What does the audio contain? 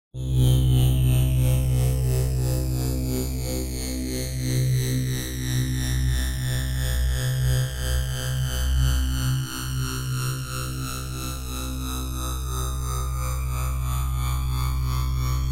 Oscillating saw
Made on a Waldorf Q rack